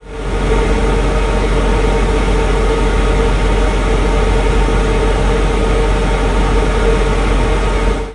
Spin dryer recorded onto HI-MD with an AT822 mic and lightly processed.
domestic, household, spin-dryer, whirr